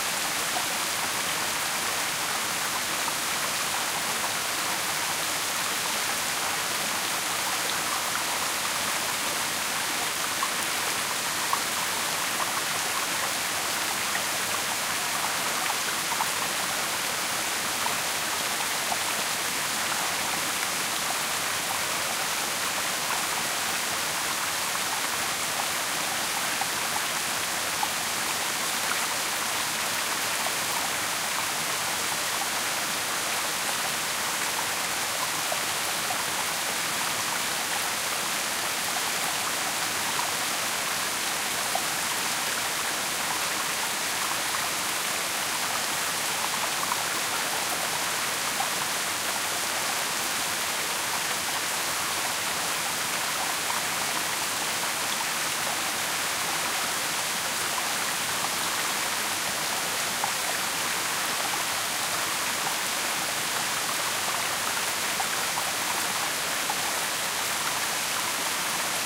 Creek River Further away
Normally this is a small creek in the forest - but this time after 4 Days of constant rain, the creek became a river - and ran wildly through the forest. This pack contains different recordings from further away and close up of the flowing creek. So could be useful for a nice soundmontage of getting closer to a waterstream or hearing iht from a distance.....
ambient, bavaria, creek, field-recording, flow, forest, gurgle, nature, river, stream, water, wild